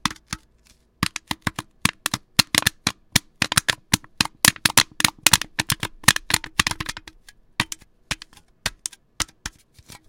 En Drink Tapping
Tapping the sides of an aluminum can.
soda, aluminum, pop, tapping